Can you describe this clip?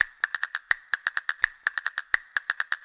recorded in my home studio: castagnette (Italian castanets) pizzica_pizzica folk dance of southern Italy rhythm
castagnette, italianpizzica